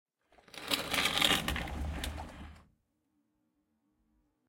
PC, pulling the case on wheels
Pulling the case on wheels out.